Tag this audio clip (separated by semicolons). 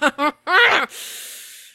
angry; female; girl; laugh; strained; voice